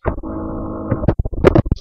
Mic Blocked
You guys are probably wondering why I haven't been posting many sounds for the last month well number one so I can upload a lot of sounds at once and two I have been pretty busy with track and play practice. So now here is a bunch of sounds that were created by me either covering up or bumping my mic which I hope you will enjoy.
Abstract, Blocked, Effect, Field-Recording, Foley, FX, Glitch, Mic, Microphone, Public, School, Technology, White-Noise